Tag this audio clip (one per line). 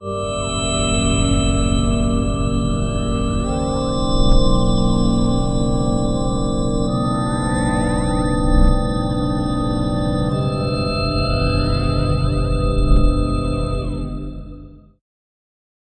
Alien-Frequencies
FM-Synthesis
FM-Synthesizer
FM-Synth-VST
FM-Synth-VST3
Four-Operator-FM
Frequency-Modulation-Synthesizer
Spherator-FM